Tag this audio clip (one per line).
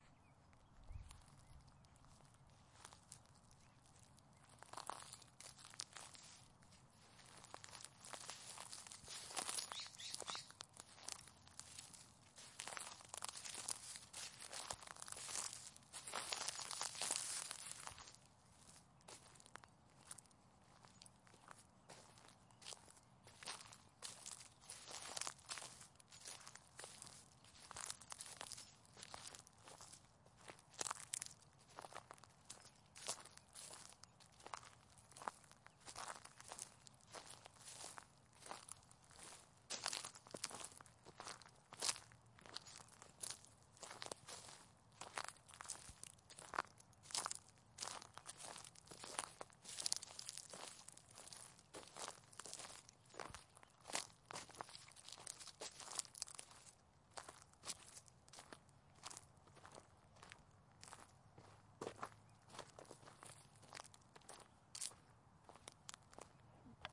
spring
park
field-recording